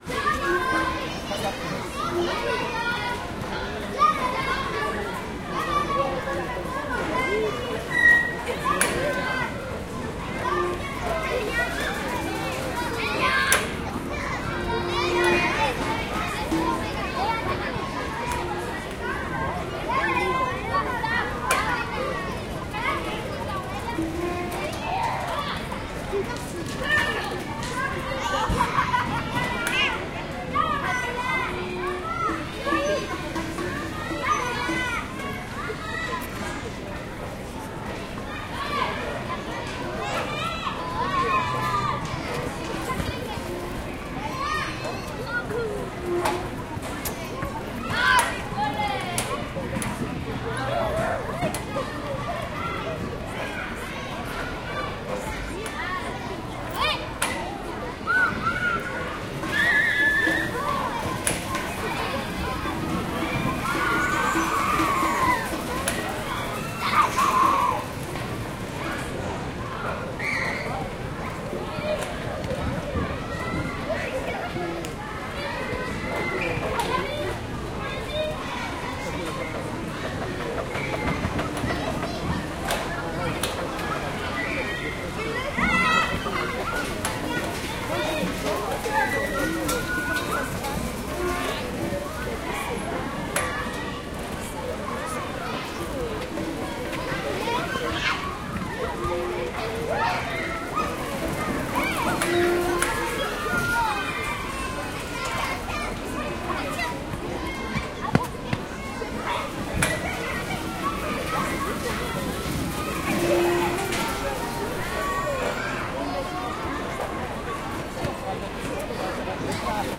This is a recording of children playing in the large playground at the Luxembourg Gardens in Paris, France. The recording was made from the east side of the playground, next to an attraction that allows kids to hang from a sort of harness and slide down a rail. The metallic clink you hear periodically is the sound of the runners on this gadget banging into each other as kids jump off of them.